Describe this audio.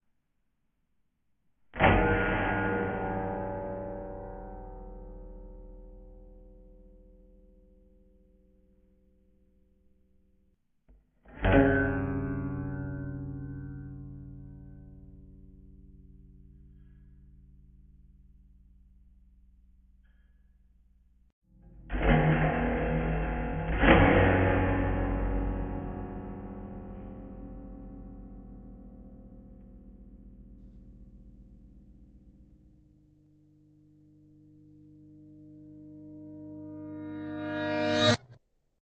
persian musical instrument cetar .... reverb & slow down
sound, effect, strange